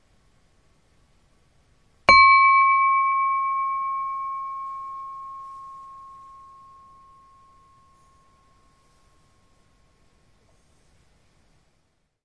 Jack's wine glass
Dartington crystal wine glass struck with a rubber headed mallet on the rim.